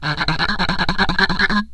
ape.rubba.08
daxophone, friction, idiophone, instrument, wood